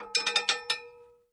Metal rattle
Slowly moving rattle
rattle, slowly, metal